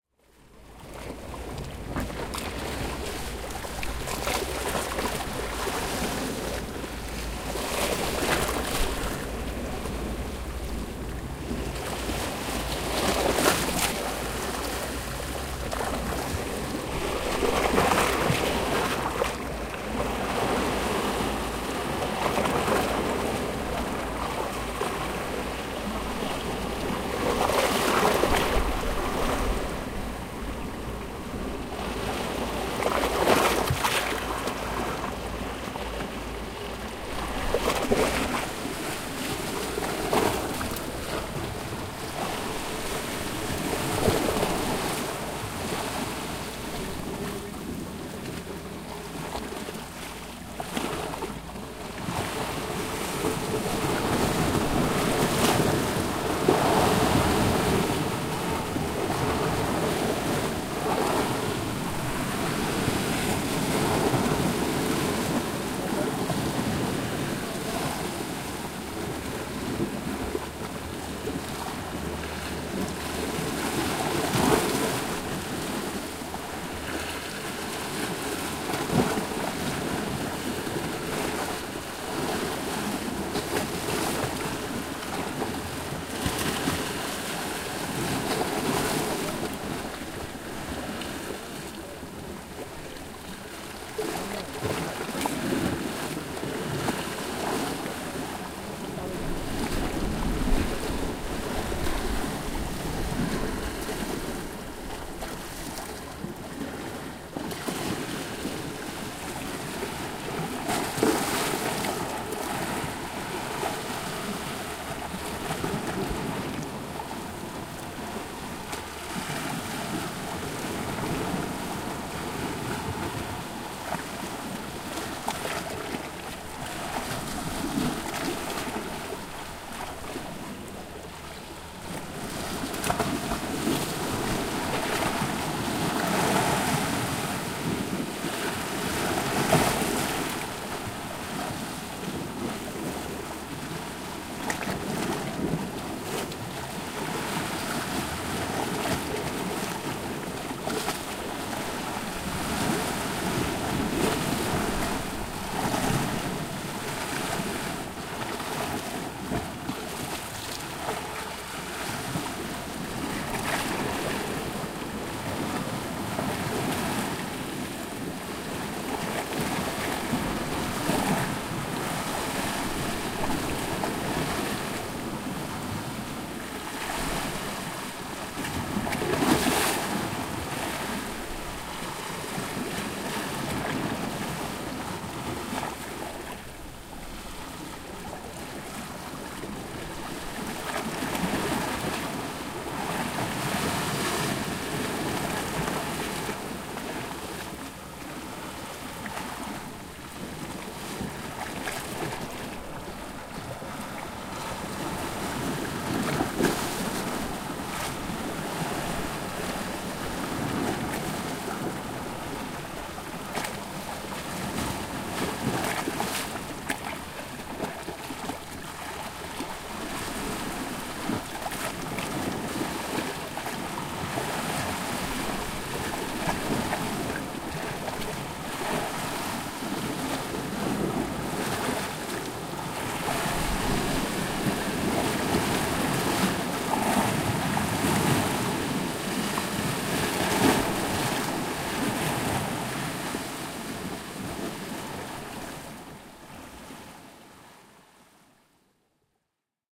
Dubrovnik Rocky Beach Sea by the Wall 02
Recorded at the beach of the City Wall of Dubrovnik, Croatia
Adriatic, Beach, Croatia, Dubrovnik, Ocean, Rocks, Sea, Splash, Summer, Wall, Water, Waves